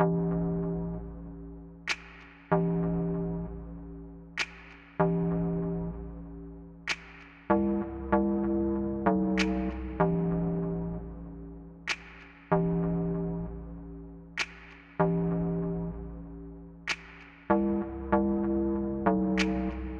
Loud bass 02.
Synths: Ableton live,synth1.
sub, drum, hip, dance, music, cleanerl, dnb, low, Loud, track, loop, original, dubstep, bass, percs, beat, loops, quantized